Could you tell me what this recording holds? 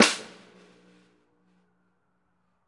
I took my snare drum into the wonderfully echoey parking garage of my building to record the reverb. Included are samples recorded from varying distances and positions. Also included are dry versions, recorded in a living room and a super-dry elevator. When used in a production, try mixing in the heavily reverbed snares against the dry ones to fit your taste. Also the reverb snares work well mixed under even unrelated percussions to add a neat ambiance. The same goes for my "Stairwell Foot Stomps" sample set. Assisted by Matt McGowin.
garage, snare